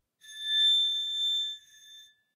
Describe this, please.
Glass Bowed 04
Glass bowed with a violin bow
Glass, Violin-Bow